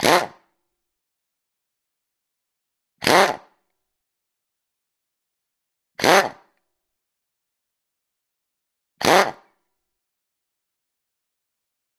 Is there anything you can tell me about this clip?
Impact wrench - Start 4
Unbranded impact wrench started four times in the air.
motor, pneumatic, pneumatic-tools, tools, work